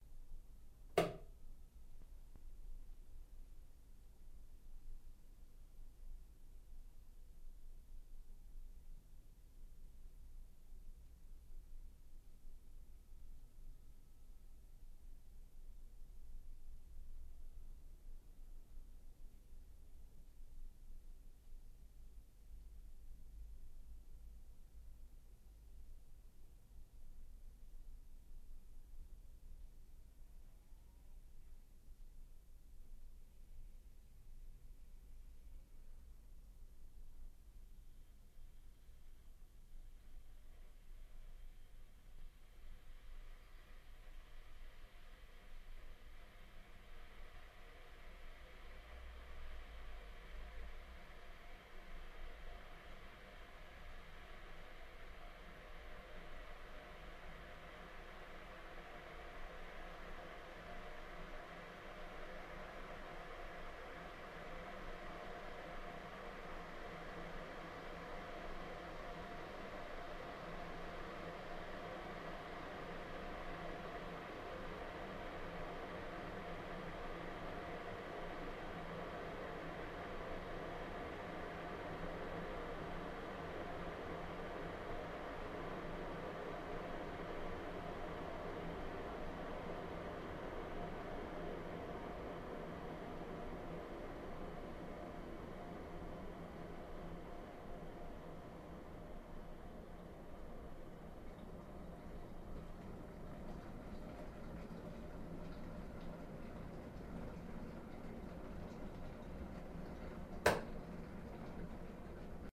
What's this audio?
kettle K monaural kitchen
Recordings of kettles boiling in a simulated kitchen in the acoustics laboratories at the University of Salford. From turning kettle on to cut-off when kettle is boiled. The pack contains 10 different kettles.
high-quality, simulated-kitchen